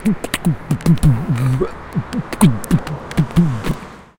LEE JH XX TI03 hmptpt-hmpt
Sound collected in Leeuwarden as part of the Genetic Choir's Loop-Copy-Mutate project.
Time, City, Leeuwarden